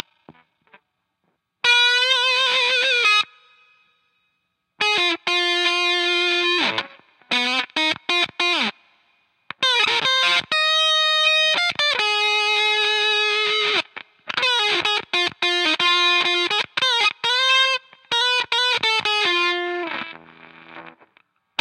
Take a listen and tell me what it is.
CTCC FUZZ 01
Guitar fuzz loops of improvised takes.
100, fm, fuzz, guitar